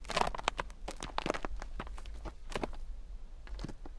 Horse Footsteps On Gravel Dirt 01
A horse stands and shifts it's weight on gravel.
Gravel, Horse, Standing